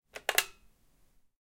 Old intercom button press and release
flat, foley, house, intercom, old, sample, telephone